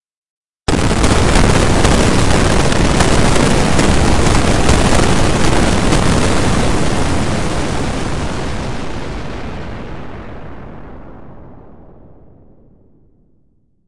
spaceship explosion8
made with vst intrument albino
explosion, soldier, military, impact, sound-design